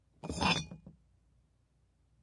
Box Of Bottles Take Out FF258
1 low pitch glass bottle slide, glass-on-glass ting, shake of glass bottles. liquid, heavy.